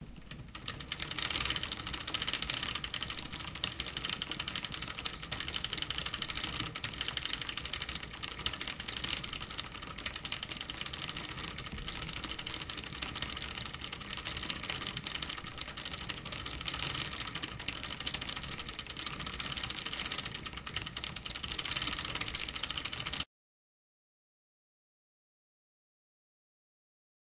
Noise produced by the chain of a bicycle.
bicycle, bike, chain, pedaling